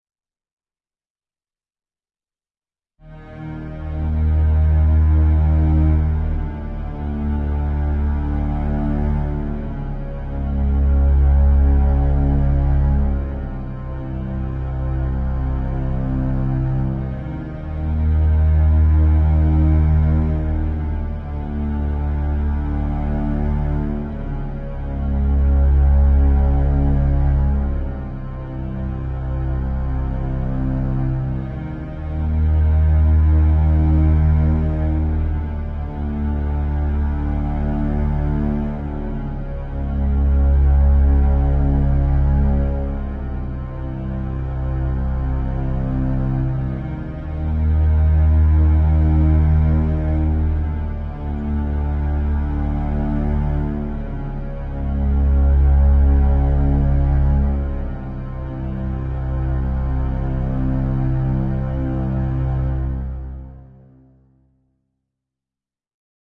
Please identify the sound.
pan filmscary
Some clips created for transition in a play. Originally for Peter Pan but maybe used for other plays.
pan, pirates, scary, scene, shots, synth, transition